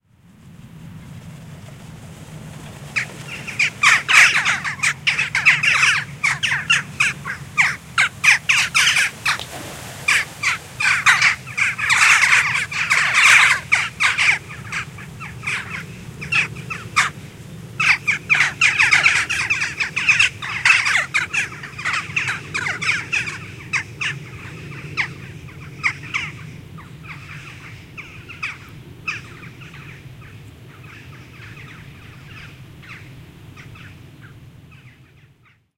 20161002 jackdaw.flock.62
Callings from a 40-odd Jackdaw group in flight, with the noise of air friction on their wings (at 10'). Audiotechnica BP4025 > Shure FP24 preamp > Tascam DR-60D MkII recorder. Recorded in the middle of nowhere, near Torre de San Antonio (Gerena, Sevilla Province, S Spain).
south-spain, birds, nature, field-recording, Western-jackdaw